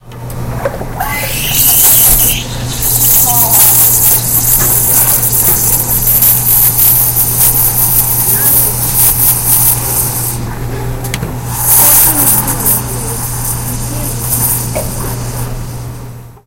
Coho - Milk Steamer
This is a recording of the milk steamer/frother at the Coho at Stanford. I recorded this with a Roland Edirol.
aip09, cafe, coffee, froth, frother, latte, liquid, milk-steamer